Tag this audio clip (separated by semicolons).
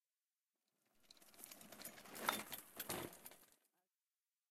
approach; bicycle; chain; click; downhill; freewheel; jump; park; pedaling; ride; rider; street; wheel; whirr